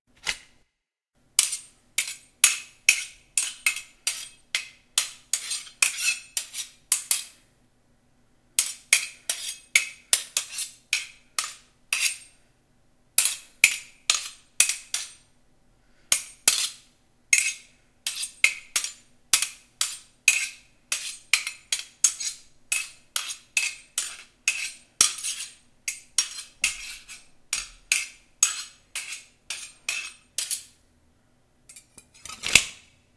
Sound Design Sword Clanging edited
Recorded myself and my roommate sparring with daggers, all of our sounds are removed and it is only the clashing of the weapons. Enjoy.